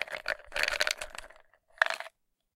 Pill Bottle Quick Shaking out Pills
Shaking pills out of a pill bottle. This is one of multiple similar sounds in the same sound pack.